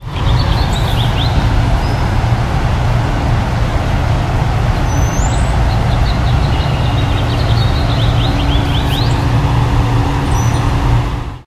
This bird song has pressure due to wind, but is pleasant to the ear, and a reminder of spring.